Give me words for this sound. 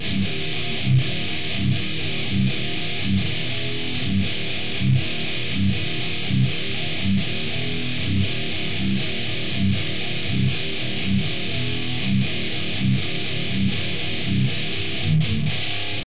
groove loop 4
i think most of thease are 120 bpm not to sure
guitar, hardcore, loops, rythem